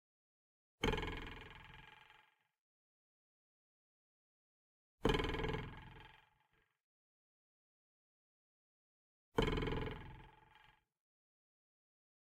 Cartoon 6'' Boing
Cartoon Boing Sound created with a ruler. The 101 Sound FX Collection